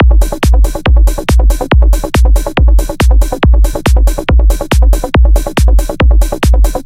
Trance beat with deep bassline
This is a short loop with a deep bassline and a good psy trance kick.
bass, bassline, beat, club, dance, deep, drum, electro, electronic, hard, house, kick, loop, progressive, psy, rave, techno, trance